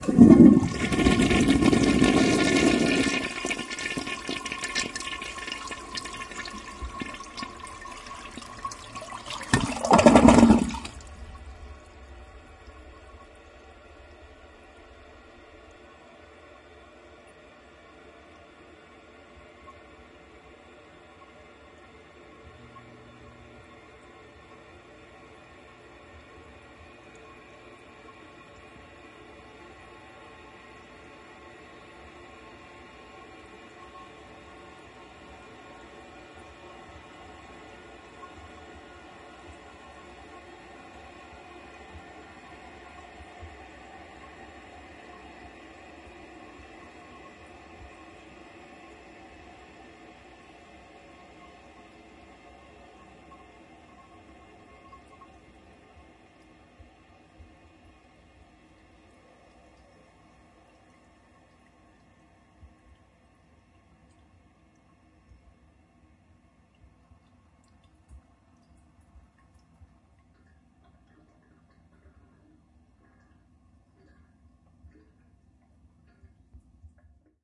This is a friend's toilet, recorded in November 2007. I used a Zoom h4 and a set of Cad M179 multi-pattern studio condenser microphones set to uni-directional, pointed into the bowl at 110 degrees.
flush, gurgle, wet, glug, water, toilet